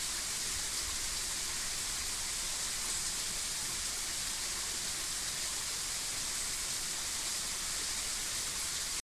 a small torrent clashes at the bottom of a ravine/un arroyo al fondo de un barranco
andalucia, field-recording, nature, south-spain, water